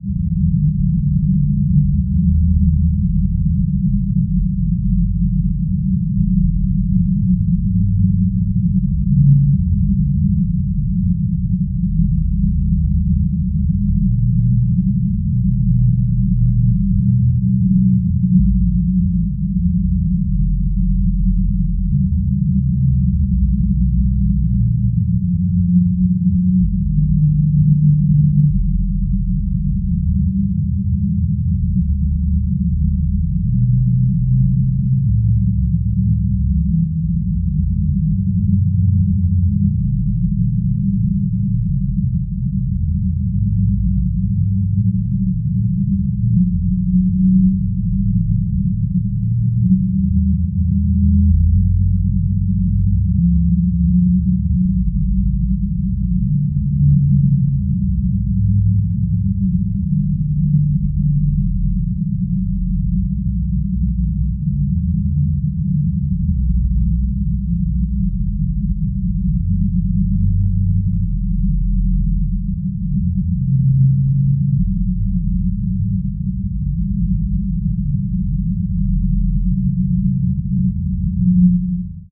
Spaceship engine 1
Deep and muffled engine sound heard from inside a huge spaceship. Sample generated via computer synthesis.
Alien, Engine, Futuristic, Galaxy, Outer-Space, Planet, Sci-Fi, SciFi, Space, Spaceship, Starship, UFO